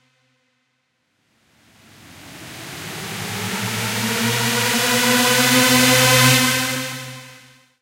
SL Uplifter 02
uplifter piched up sound, created with Reaper, Synth1 VST, Wavosaur, Tal-Reverb3.
piched, sound, uplifter